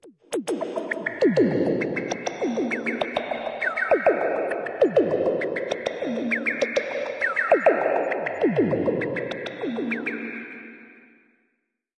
THE REAL VIRUS 04 - RESONANT FREQUENCY LOOP 100 BPM 4 4 - C5
High resonant frequencies in an arpeggiated way at 100 BPM, 4 measures long at 4/4. Very rhythmic and groovy! All done on my Virus TI. Sequencing done within Cubase 5, audio editing within Wavelab 6.